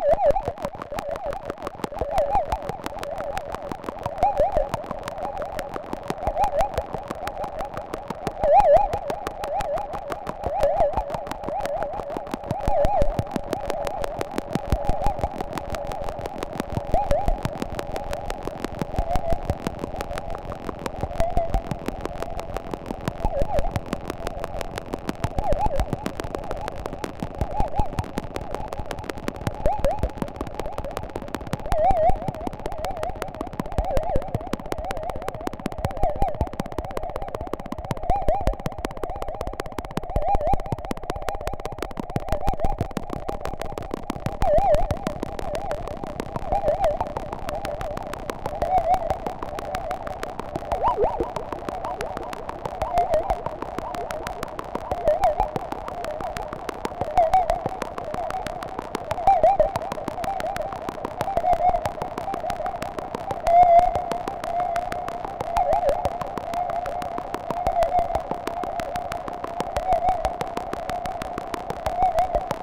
synthetic, cricket-like sounds/atmo made with my reaktor-ensemble "RmCricket"